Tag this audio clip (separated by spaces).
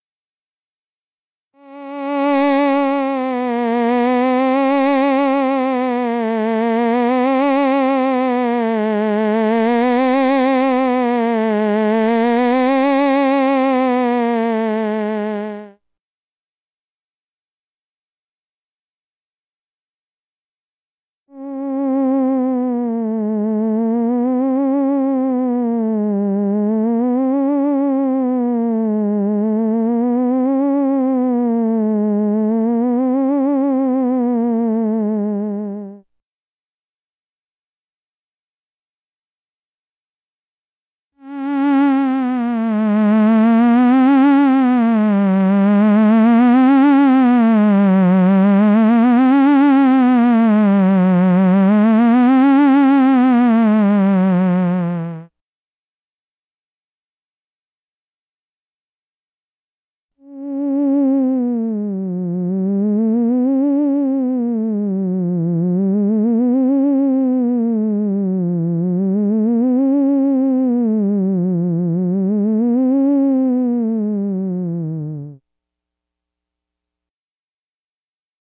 sci-fi hypno-turn-you-into-zombie